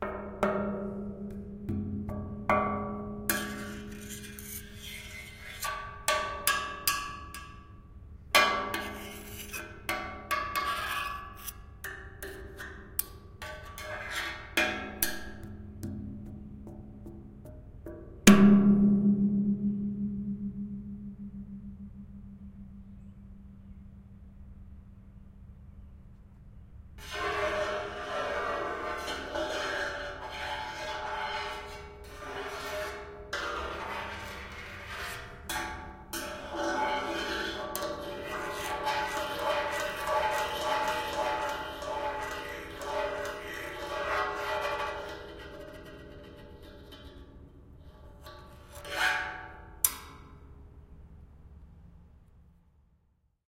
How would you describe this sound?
singletary metal sculpture
This is an excerpt of a longer composed field recording that was captured on a steel untitled metal sculpture at 4am at the University of Kentucky's Singletary Center for the Arts (we did it at night so as to not get caught by the security).
field-recording, metal, steel, sculpture, scraping